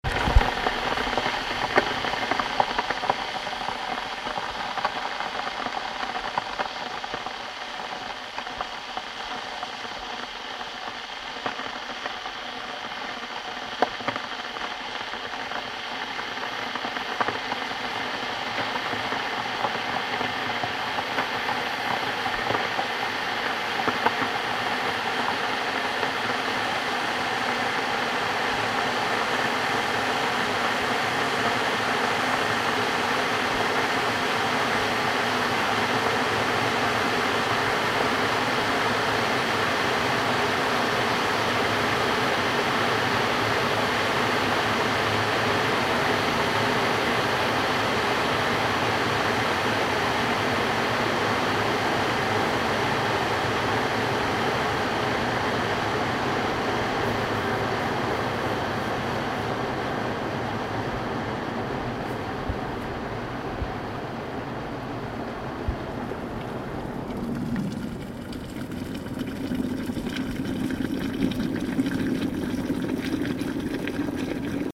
Water boiling in a kettle
The sound of water heating up and boiling in a kettle
kettle, water, liquid, boiling, bubbling, bubbles, boiling-water